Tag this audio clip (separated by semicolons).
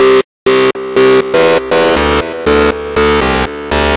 loop; beat; trance; dream; dj; abstract; dance; 120; bpm; techno; atmosphere; synth; club